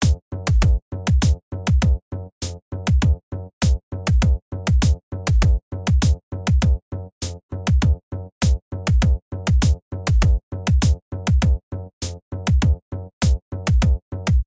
short shark theme for game